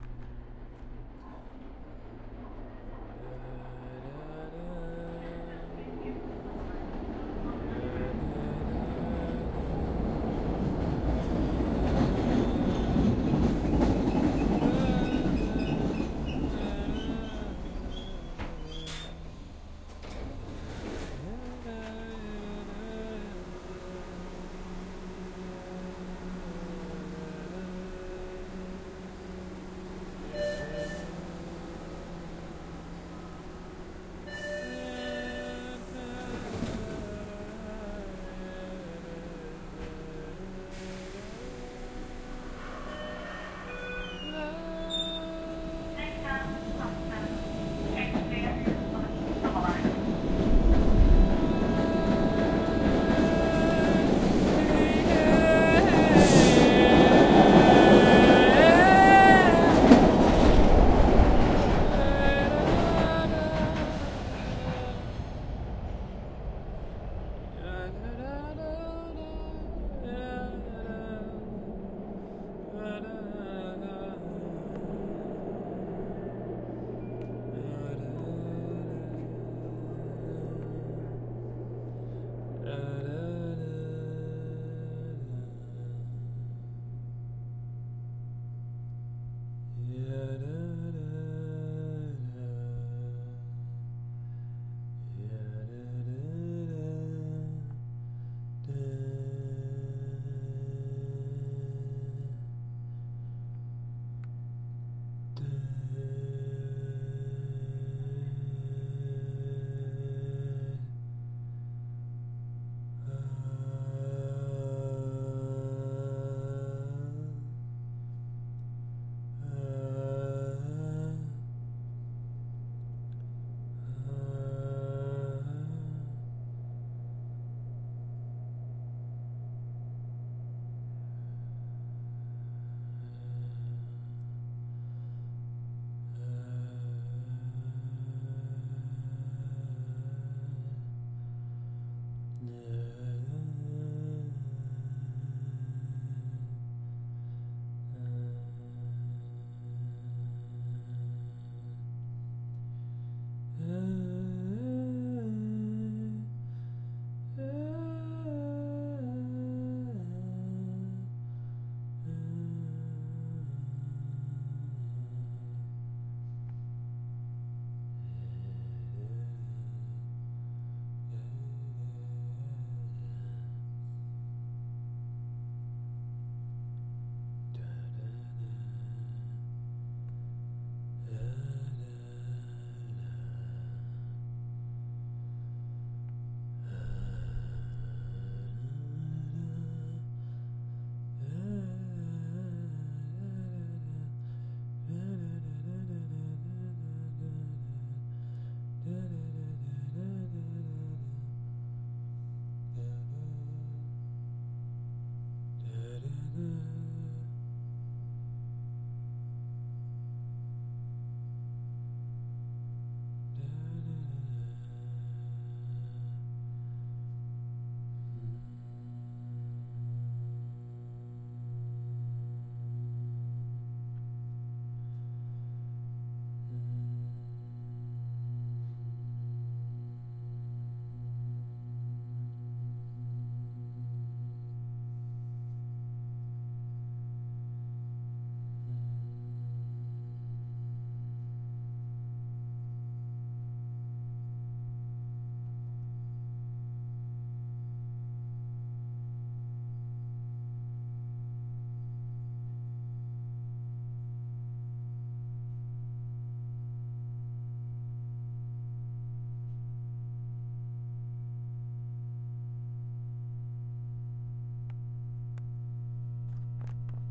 A man singing with the sounds of the on coming train/ subway car, and mumbling along with the naturally amplified sound of the over head fluorescent lamps.